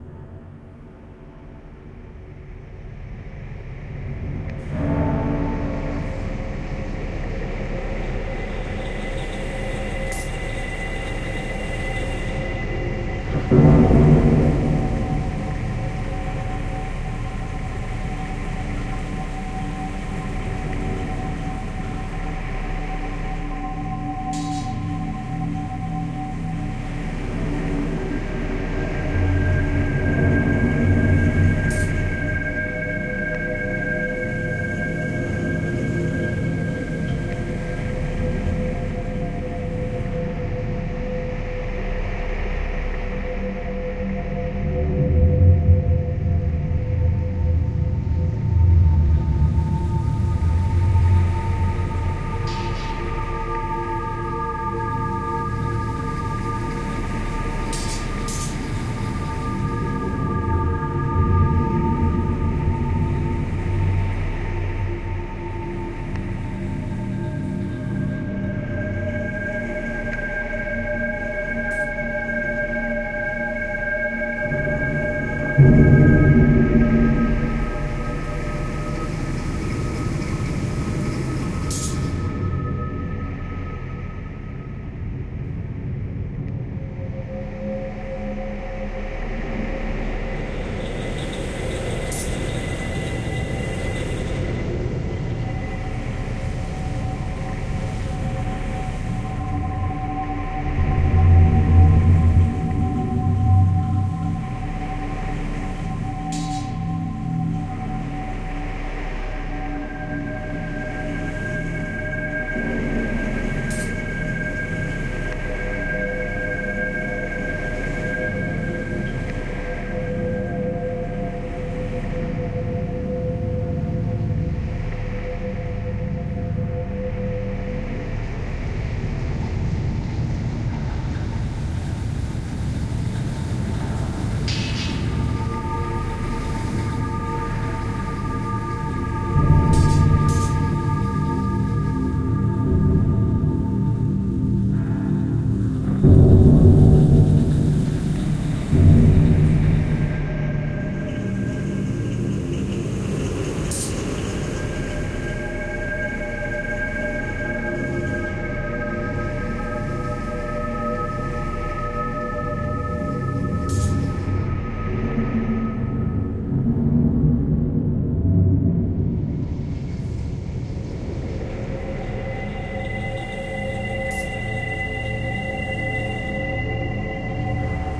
Abadoned Cave Factory Atmo Background
Movie, Cave, Film, Atmo, Atmosphere, Sci-Fi, Horror, Abadoned, Factory, Cinematic, Thriller, Dark, Ambient, Background, Drone